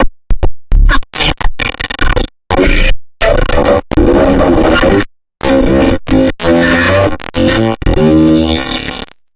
Messy Distrortion/gated/voice
voice, dist